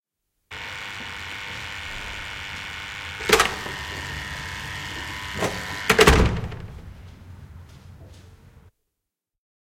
Vankilan ulko-ovi / Electrical lock, front door of a prison, buzzer, door open and close, interior
Summeri, sähköinen lukko aukeaa, ovi auki ja kiinni. Sisä.
Paikka/Place: Suomi / Finland / Helsinki, Keskusvankila, Central Prison
Aika/Date: 23.08.1978